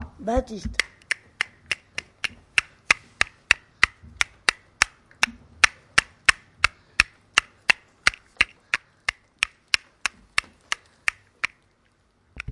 Sounds from objects that are beloved to the participant pupils at La Roche des Grées school, Messac. The source of the sounds has to be guessed.
France, messac, mysounds